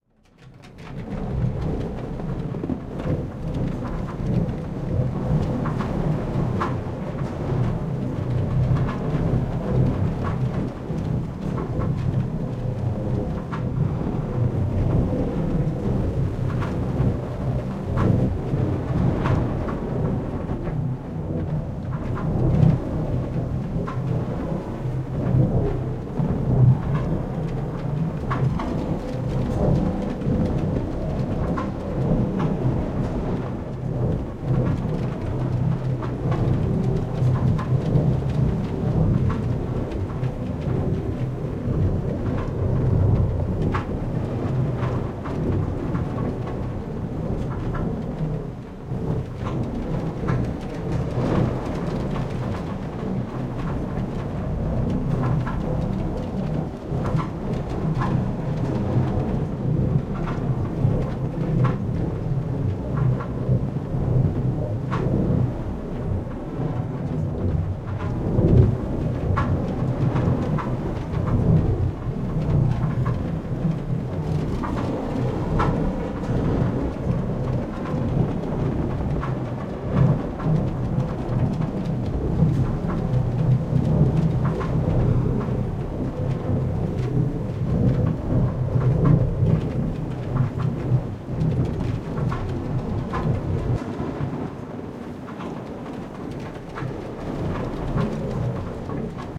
Machine Steampunk Factory
Atmosphere, Movie, Artificial, Cinematic, Wind, Ambiance, Fiction, Unusual, Synthetic, Industrial, Science, Sci-Fi, Ambience, Ambient, Mechanical, Film, Noise, Weird, Strange, Steampunk, Crazy, Alien, Factory, machine, Machinery, Trains